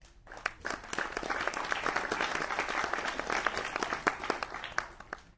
Audience of about 150 people applauding in a cinema. Recorded on an Edirol R-09 with built-in mics.